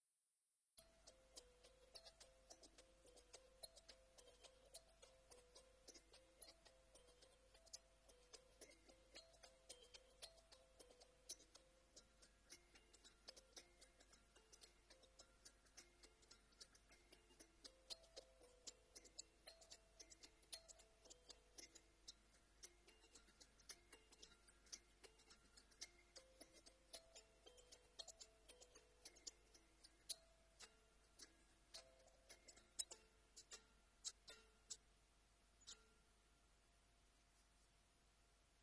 Recording of a Hokema Kalimba b9. Recorded with a transducer attached to the instrument and used as microphone input with zoom h2n. Raw file, no editing.